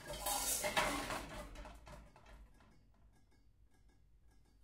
pots n pans 09
pots and pans banging around in a kitchen
recorded on 10 September 2009 using a Zoom H4 recorder
pots, kitchen, rummaging, pans